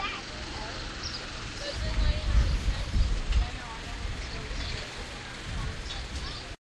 The hammer falls silent and the wind destroys the ambiance recorded with DS-40 and edited in Wavosaur.